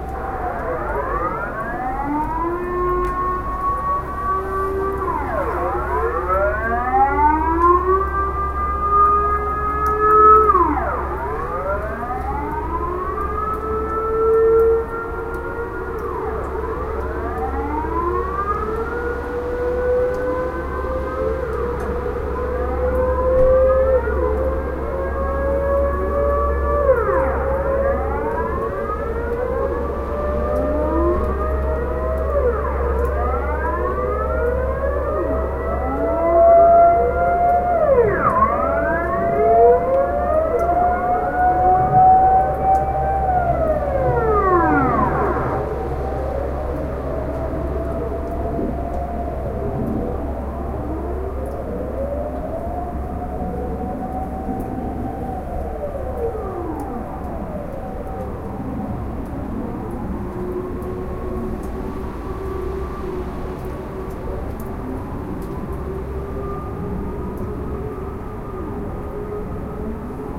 Horns of Utrecht recorded in 2012
field-recording, horns, utrecht